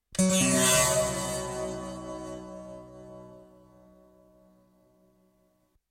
cythar chord arpeggio freezing